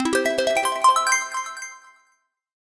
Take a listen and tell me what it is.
Jingle Win Synth 05
An uplifting synth jingle win sound to be used in futuristic, or small casual games. Useful for when a character has completed an objective, an achievement or other pleasant events.
achievement, celebration, electric, futuristic, game, gamedev, gamedeveloping, games, gaming, indiedev, indiegamedev, jingle, sci-fi, sfx, succes, synth, video-game, videogames, win